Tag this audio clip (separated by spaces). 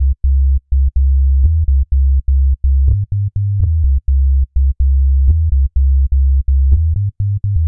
reggae rasta Roots